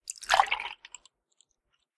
Liquid Fill Glass
Filling a glass with liquid.
Recorded with a Blue Yeti microphone.
wet, glass, water, pour, milk, high-quality, filling, liquid